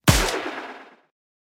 Shot,scifi,powerdown
Scifi gun shot. Great for films and games.